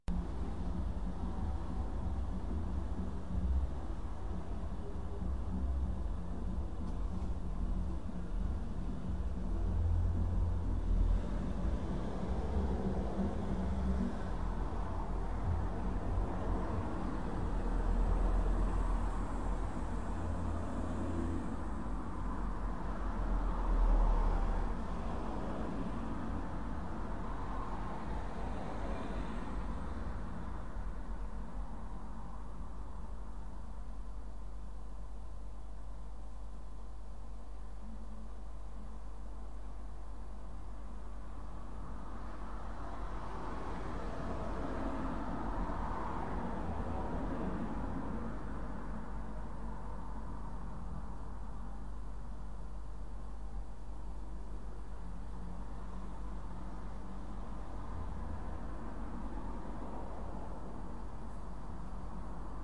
Cars Passing By
Recorded from my room from the second floor of a house that overlooks a main road here in the UK.
car, cars, street, urban